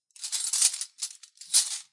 I recorded these metal sounds using a handful of cutlery, jingling it about to get this sound. I was originally planning on using it for foley for a knight in armor, but in the end decided I didn't need these files so thought I'd share them here :)